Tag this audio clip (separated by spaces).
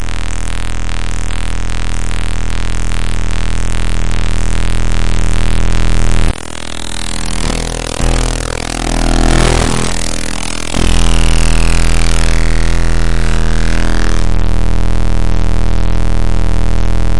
audacity computer data drone electronic feedback glitch glitchy interference noise power raw static whitenoise